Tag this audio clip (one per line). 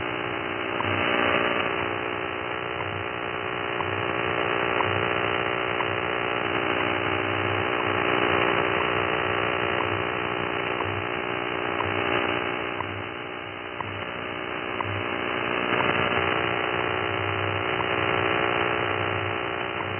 buzz
ham
jam
radio
wwv